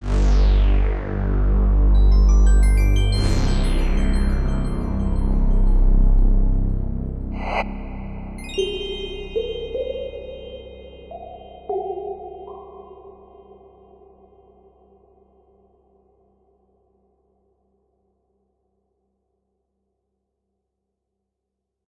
PSX startup
Now this sound is a recreation of the famous Sony Playstation boot sound when the logotype was seen on screen. Created with FL Studio and a few plugins.